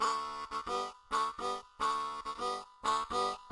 Harmonica Rhythm 05
I played a rhythm rift on a Marine Band harmonica.